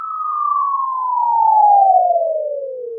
GARCIA Marleen 2014 2015 FallingOverTheEarth
HOW I DID IT?
Generate a whistle sound (sinus) starting at 440hz finishing at 1320hz (logarythmic interpolation /3 seconds)
Use reverberation effect (Room size 96 ; Reverberance 50; Damping 50 ; Tone Low 100 ; Tone Hight 100 ; Stereo Widht 100 ; all other at 0)
Use the level tool
Inverse sense.
HOW CAN I DESCRIBE IT? (French)----------------------
// Typologie (Cf. Pierre Schaeffer) :
X (Continu complexe) + V ( continu Varié)
// Morphologie (Cf. Pierre Schaeffer) :
1- Masse:
- Son seul complexe
2- Timbre harmonique:
acide, spacial
3- Grain:
lisse
4- Allure:
Le son comporte un vibrato
5- Dynamique :
Attaque en entrée et descente progressive
6- Profil mélodique:
Variations serpentines
7- Profil de masse
Site :
1 son descendant
Calibre :
RAS
falling, meteors, ovni